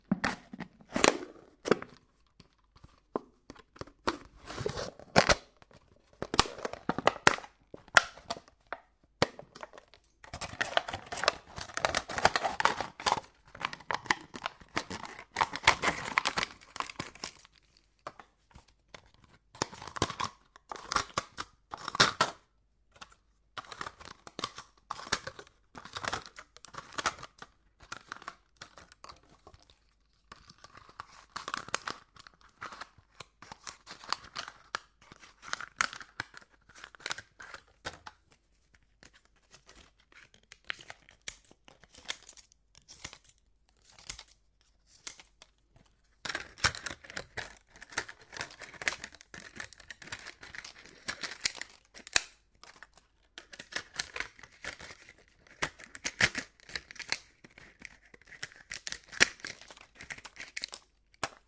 Knife Cutting Plastic Bottle
Knifing a bottle.
Result of this recording session:
Recorded with Zoom H2. Edited with Audacity.
PET-bottle, cutter